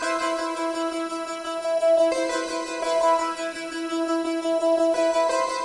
Texas Ranger 002
Electric dulcimer kind of synth part
electronica, high, synth, thin